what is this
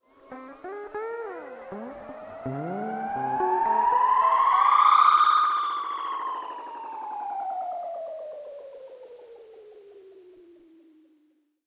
A strange magical sound like a spell being cast or something!